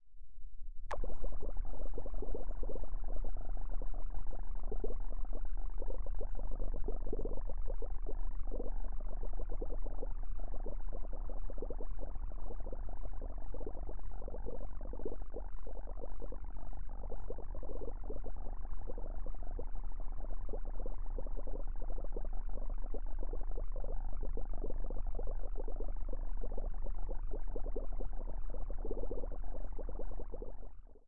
A series of sounds made using the wonderful filters from FabFilter Twin 2 and which I have layered and put together using Audicity. These samples remind me of deep bubbling water or simmering food cooking away in a pot or when as a kid blowing air into your drink through a straw and getting told off by your parents for making inappropriate noises. I have uploaded the different files for these and even the layered sample. I hope you like.
Boiling, Bubbles, Bubble-sound-effect, Bubbling, Cooking, Cooking-pot, Deep-Bubbling-water, Water